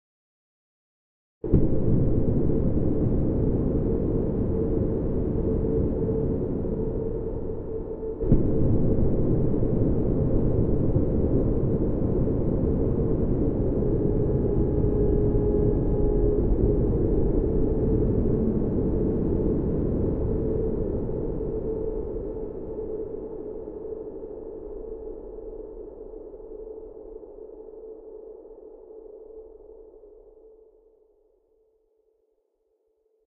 A distant horn calls out over a deep blast. A low boom - part of my Strange and Sci-fi pack which aims to provide sounds for use as backgrounds to music, film, animation, or even games.
ambience, atmosphere, blast, boom, cinematic, city, dark, drum, electro, music, percussion, processed, rumble, sci-fi, space, synth